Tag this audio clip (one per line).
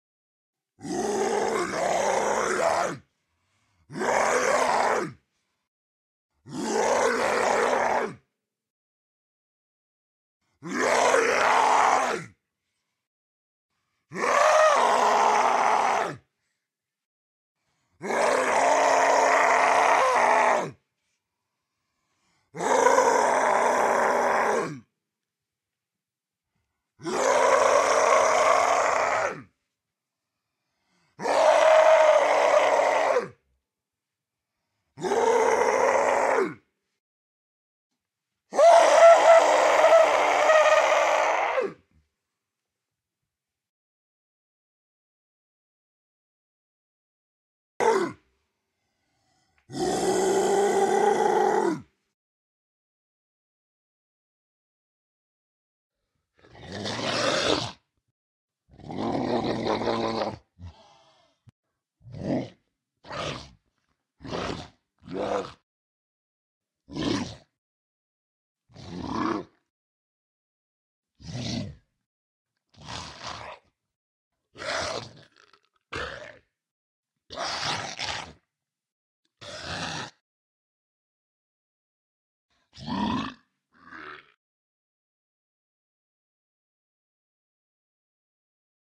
beast
creature
growl
horror
moan
monster
roar
undead
zombie